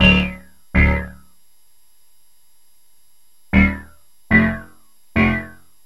hauptteil einzeln
harmonies with space between for sampling.
freehand, an1-x, syntheline, played, yamaha